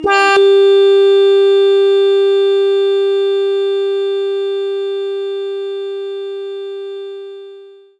PPG 014 Sustained Organwave G#4
This sample is part of the "PPG
MULTISAMPLE 014 Sustained Organwave" sample pack. The sound is similar
to an organ sound, but at the start there is a strange attack
phenomenon which makes the whole sound weird. In the sample pack there
are 16 samples evenly spread across 5 octaves (C1 till C6). The note in
the sample name (C, E or G#) does not indicate the pitch of the sound
but the key on my keyboard. The sound was created on the Waldorf PPG VSTi. After that normalising and fades where applied within Cubase SX & Wavelab.
sustained, organ